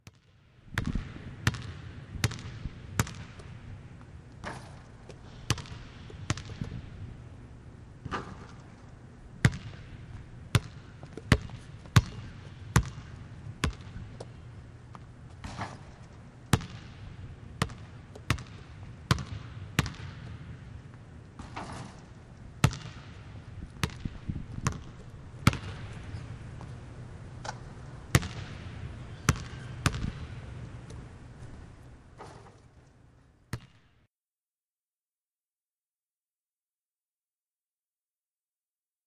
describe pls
Sitting in a gym and recording a basketball practice. No crowd, so primarily just sounds of the ball and shoes.